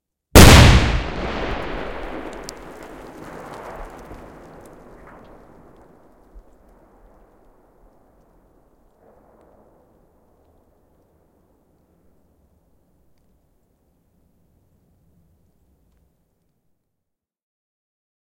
Räjähdys, räjähde / Explosion, an echoing loud explosion, patter, exterior
Voimakas räjähdys, maapaukku, vähän rapinaa.
Paikka/Place: Suomi / Finland
Aika/Date: 01.01.1989
Bomb, Explosive, Finland, Finnish-Broadcasting-Company, Soundfx, Tehosteet, Yle, Yleisradio